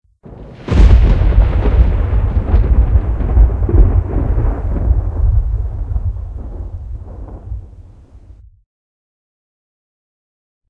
Thunder Clap 1
Single thunder clap.
Thunder, thunder-clap